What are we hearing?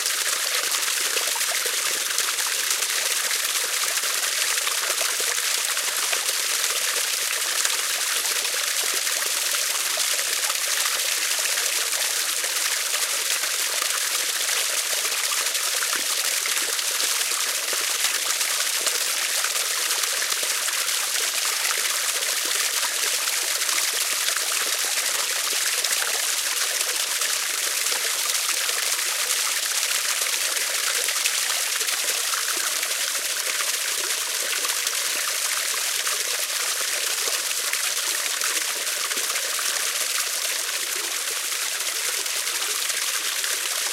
Running water 20121021T2335
Running water coming out of the forest after a heavy rain. Recorded with an Olympus LS-10 at a distance of approx. 1 meter.
field-reording, running-water, water